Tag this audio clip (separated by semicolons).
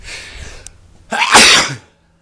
sneeze; voice